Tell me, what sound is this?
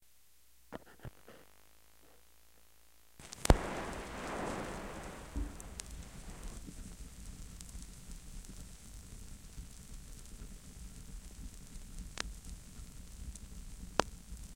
record static 2
End static of a record, take 2.
record, static